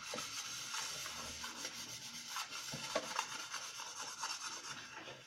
The sound of a person washing the plates in a sink
plates, sink, washing, dishes